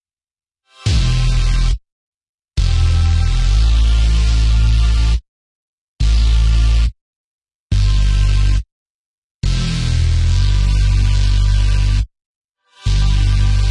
Layered DUB
Made with NI Massiv 1.0.3, mixed with Waves Platinum in ACID Pro7.0.
140BPM msec conversion = 107.14,214.29,321.43,428.57,857.14,9.333,2.333,0.583
loop, house, brostep, ambient, Dub, synth, trance, DnB, sequenced, minimal, Drum-and-Bass, glitch, 140, edm, DUBSTEP, drop, massive, trap, tech, bpm